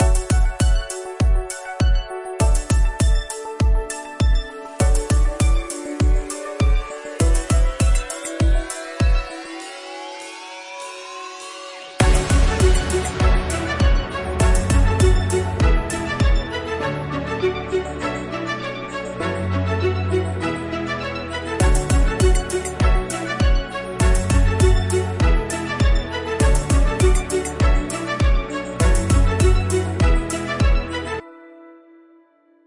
I used Ableton to make a buildup loop using strings as well as drums with a crash.
Buildup, Violin, String, Loop